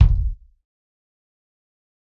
Dirty Tony's Kick Drum Mx 063
This is the Dirty Tony's Kick Drum. He recorded it at Johnny's studio, the only studio with a hole in the wall!
It has been recorded with four mics, and this is the mix of all!
dirty, drum, kick, kit, pack, punk, raw, realistic, tony, tonys